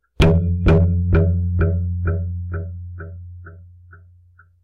Hits from a contact mic instrument with 2 rubber bands and 2 springs.